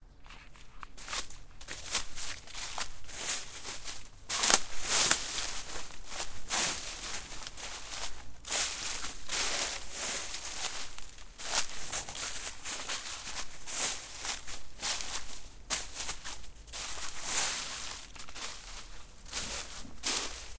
Walk on a carpet of dead leaves during an autumn day in Rome